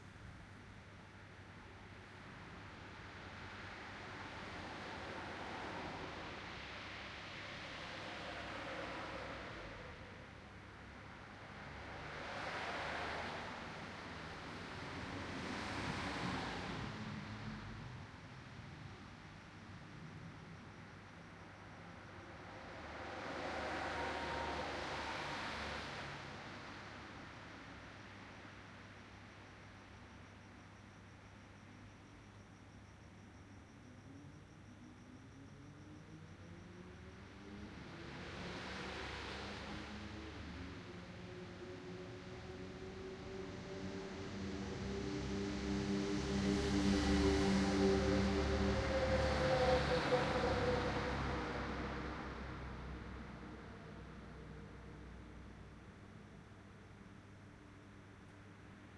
Traffic Tandoori 50 Meters
Standing 50 meters back from a road (down a side-street looking back toward the road) at about 8.00 pm at night.
binaural, cars, field-recording, road, street-ambience, swish, traffic, woosh